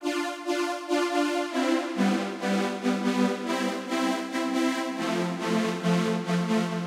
synth-1 sound loop
loop, sound, synth-1, techno, trance, vst